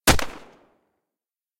gun-gunshot-02
soldier, shoot, army, military, training, shooting, war, live-fire, weapon, gun, firing